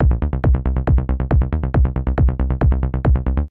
TR LOOP - 0510
goa goa-trance goatrance loop psy psy-trance psytrance trance